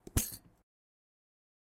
opening back of film camera
short audio file of the back of a camera being opened
8mm, camera, filming